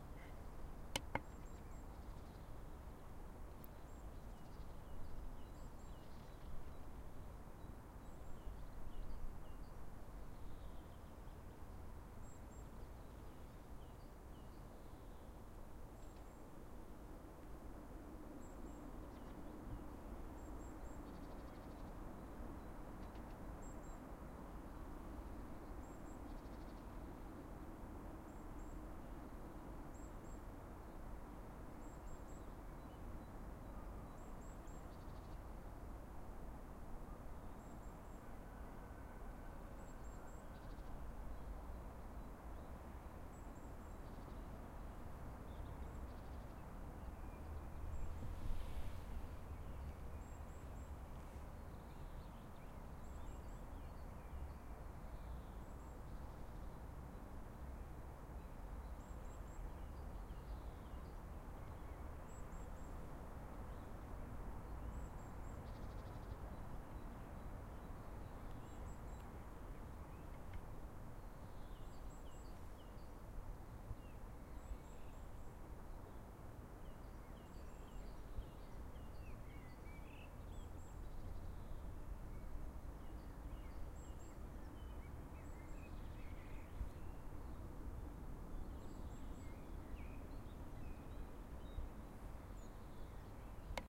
Soft spring ambience with birds and traffic.
birds, spring, park-ambience, springbirds, spring-ambience, park, ambience